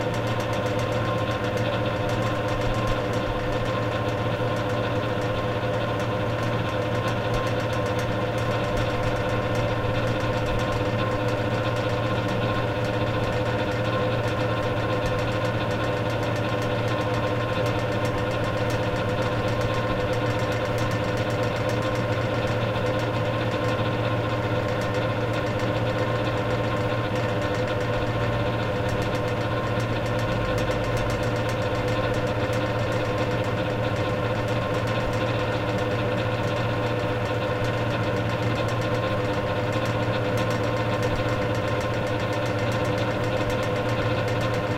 Small construction fan (LOOP) 20130424
A small (noisy) construction fan placed in my basement recorded with a Zoom H2. The recording is cut so you are able to loop it.